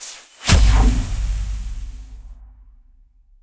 Heavily relying on granular synthesis and convolution